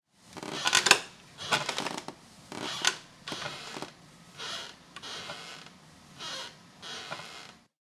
An old rocking chair in movement on the wooden floor of an apartment in Montreal.
chair, crack, squeek